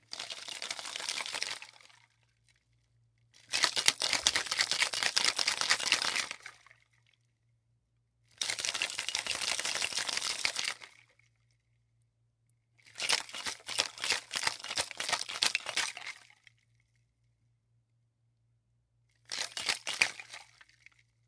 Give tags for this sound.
shaking martini-shaker martini

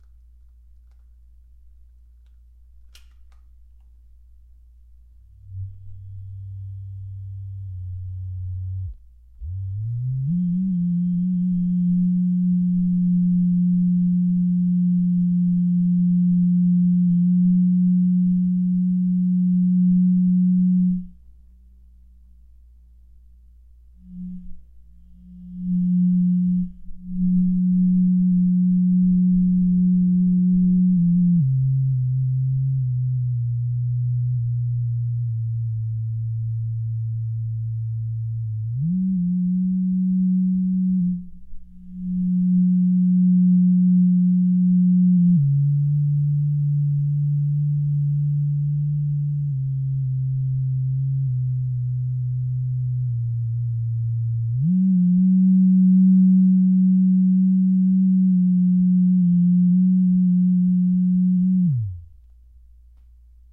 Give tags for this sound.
foley messager vibrator

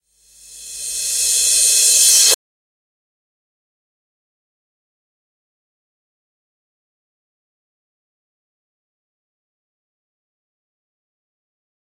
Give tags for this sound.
metal cymbals echo reverse fx cymbal

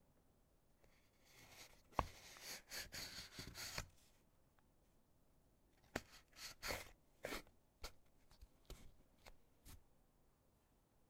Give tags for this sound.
box owi package wood cardboard

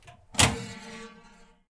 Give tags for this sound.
opening food open oven kitchen cook cooking

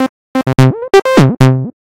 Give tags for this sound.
303 acid house loop propellerhead reason roland tb-303

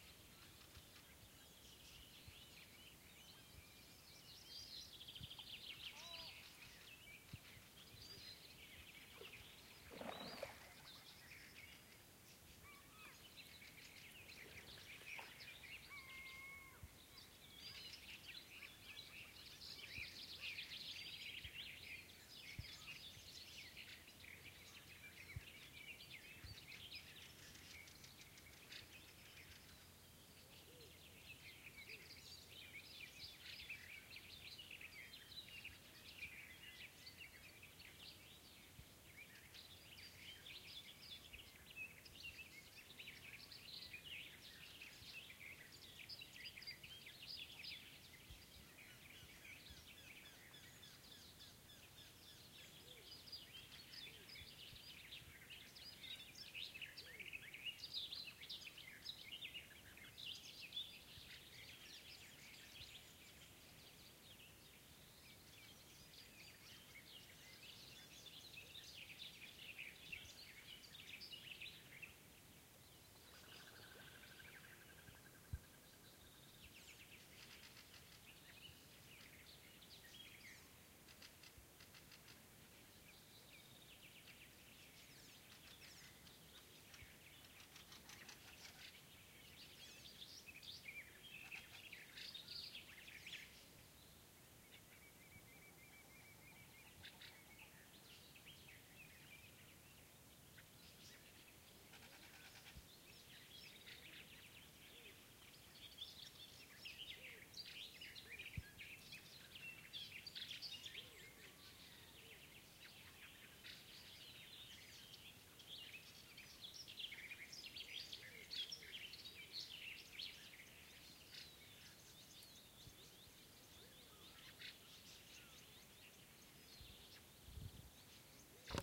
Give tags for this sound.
Archipelago Early Finland summerBirds

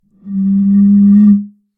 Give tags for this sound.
one-shot sample-pack air blow resonance building-block 33cl columns blowing

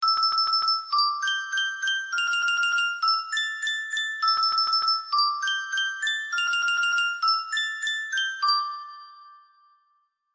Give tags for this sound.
music sample musicbox

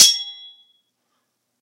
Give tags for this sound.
ping ting slashing metal clank metallic hit metal-on-metal clash slash ringing impact ding

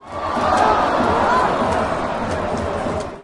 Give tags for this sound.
Ambient Baseball Crowd Soundscape

